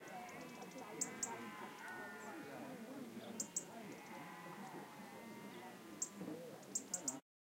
General Farm Ambience 03 (Ceredigion)
Ambience, Atmosphere, Barking, Birds, Chatter, Countryside, Dog, Farm, Outdoors, Sheep, Tweeting, Wales